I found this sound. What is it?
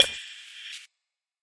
layered hit
sound made out of few small cuts of audio layered on top of each other and slightly processed and compressed alogether